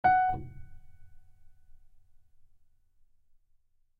acoustic piano tone
acoustic, piano, realistic, wood